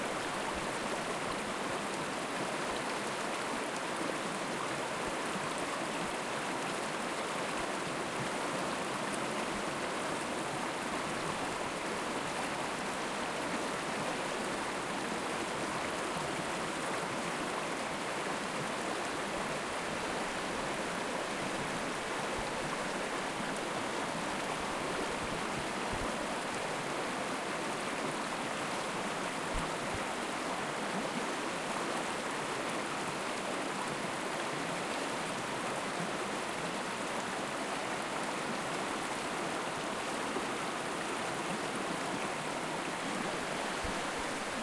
Small waterfall off tree root, bubbling - Panther Creek
Various sounds recorded at a campsite by Panther Creek. All sounds in this pack have running water in them, some up close and some at a distance.
water, nature